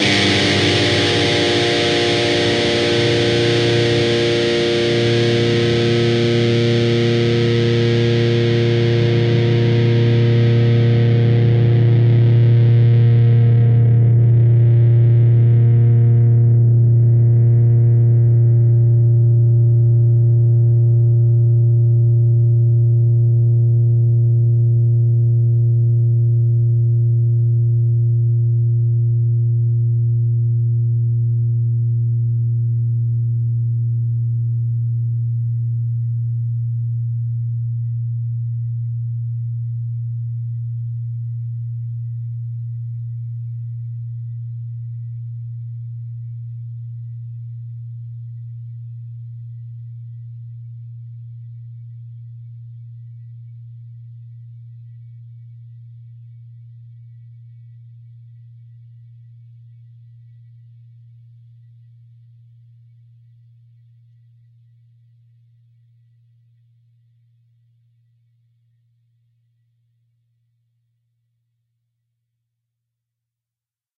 Dist Chr Gmin
E (6th) string 3rd fret, A (5th) string 1st fret, and D (4th) string, open. Down strum.